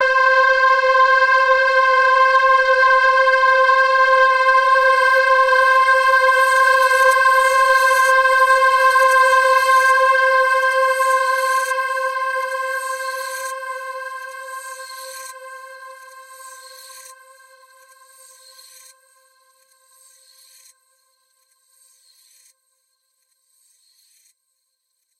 A very dark and brooding multi-sampled synth pad. Evolving and spacey. Each file is named with the root note you should use in a sampler.
ambient, dark, granular, multi-sample, multisample